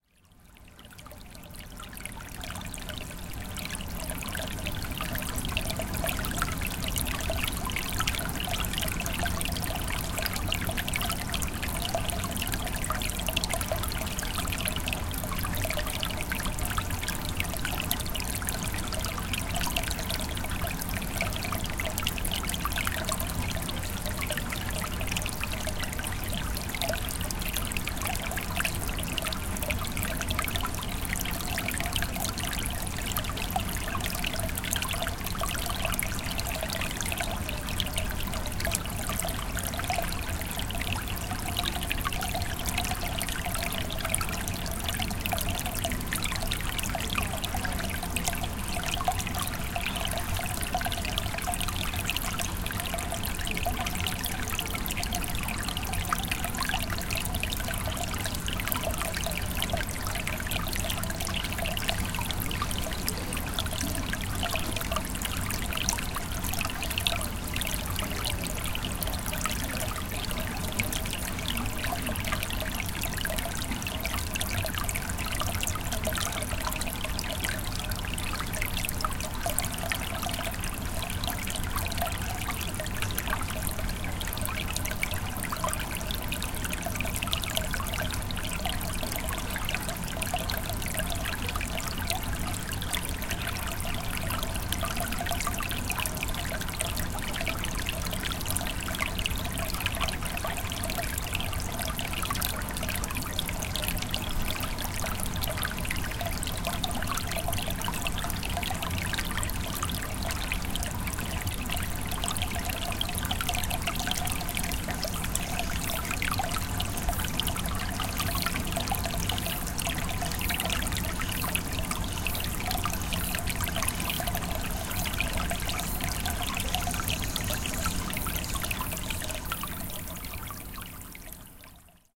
0380 Water flow quiet
Water flow quietly at Changdeokgung Palace, Secret Garden.
20120721
field-recording, korea, seoul, water